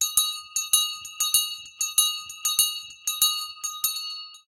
Ringing a Hand Bell
Loudly ringing a large (6") hand bell. Recorded with an RV8 large diaphram condensor mic.
Hand-Bell, Ringing